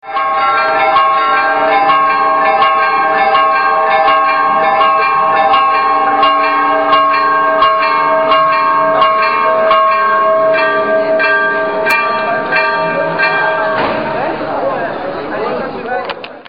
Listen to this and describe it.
Christmas Bells Athens
Walking around Athens on Christmas Eve.